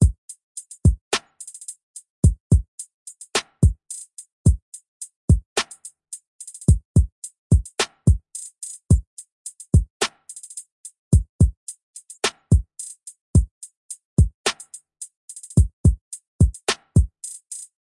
Hip-Hop Drum Loop - 108bpm
Hip-hop drum loop at 108bpm
hat, percussion, beat, trap, drum, rap, hip-hop-drums, percussion-loop, clap, kick, drums, snare, drum-loop, hi-hat, hip-hop, hip-hop-loop, loop